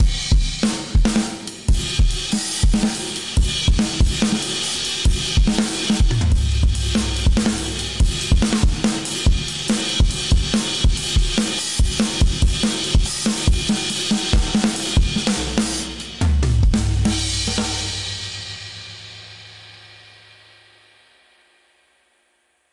Live Drums, Punchy & Compressed (Ride) - 95bpm
Punchy, slammed drum track that loops with "Live Drums, Punchy & Compressed".
95bpm
Slammed, Cymbal, Studio-Drum-Kit, Crash, Studio-Recording, Live, Hi-Hats, Cymbals, Punchy, Closed, Studio-Drum-Set, Drums, Drum-Kit, Compressed, Drum, Snare, Splash, Loop, Slam, Hi-Hat, Drum-Set, Ride, Drumset